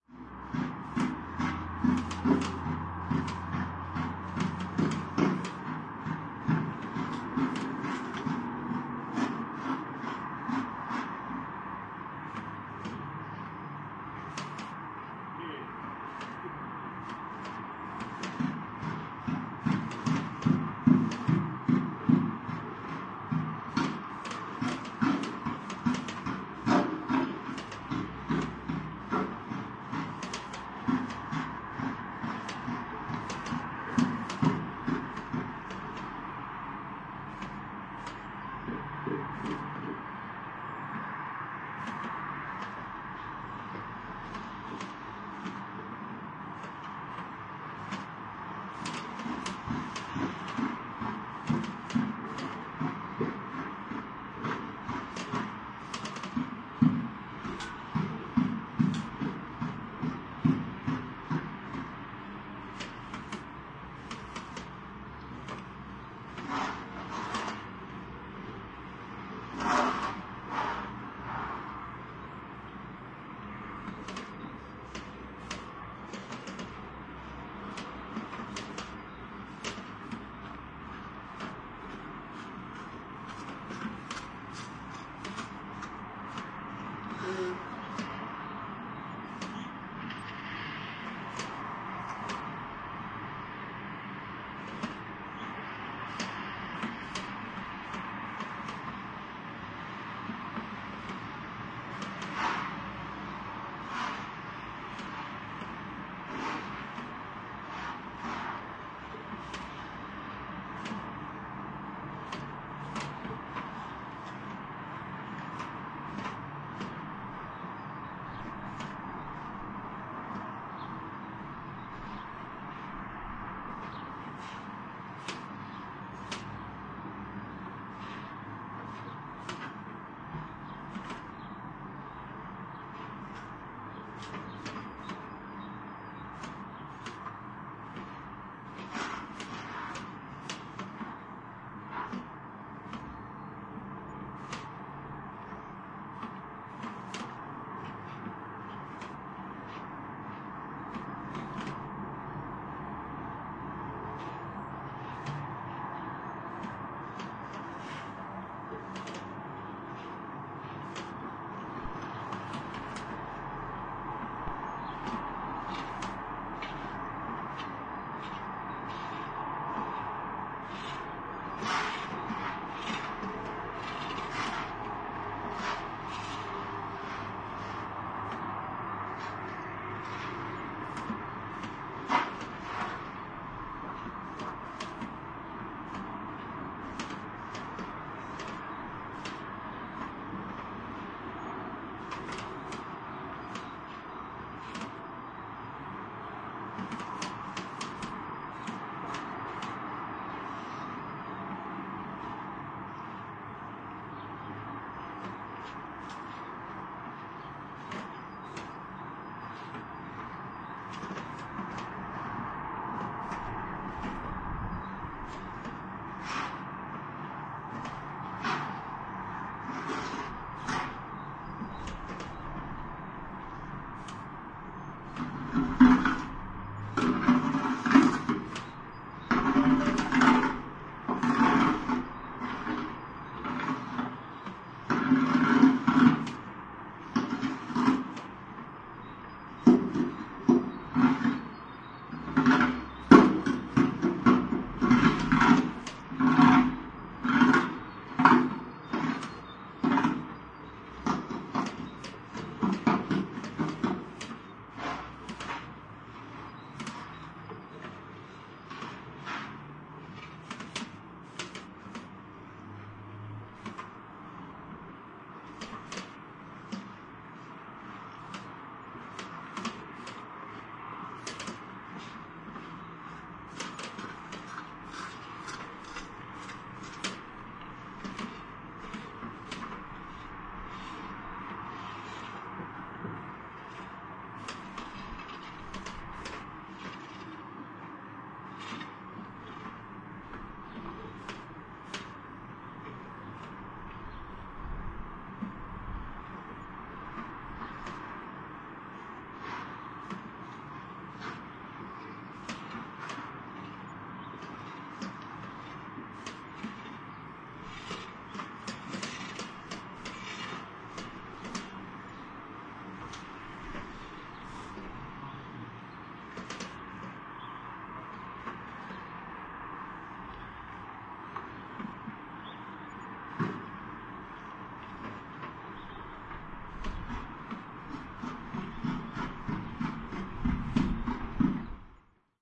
winter snow frost
frost, snow, winter